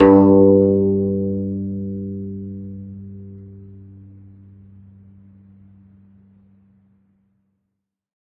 single string plucked medium-loud with finger, allowed to decay. this is string 5 of 23, pitch G2 (98 Hz).